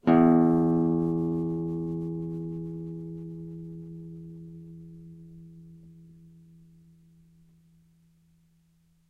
E open string
open E string on a nylon strung guitar.
classical, e, guitar, note, nylon, open, spanish, string